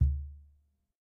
Cajon "Bass" samples in different velocities (The lager numbers ar the softer Sounds, The smaller are louder)
Recorded Stereo (An AKG 112 on the Back side, the Sure Sm7b on the Front)
To avoid phase problems, frequencies below 300 Hz are paned MONO!!)